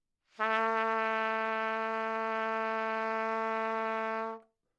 overall quality of single note - trumpet - A3
Part of the Good-sounds dataset of monophonic instrumental sounds.
instrument::trumpet
note::A
octave::3
midi note::45
tuning reference::440
good-sounds-id::1329
single-note, multisample, good-sounds, neumann-U87, A3, trumpet